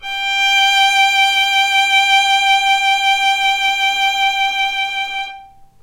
violin arco vib G4
violin arco vibrato
violin, arco, vibrato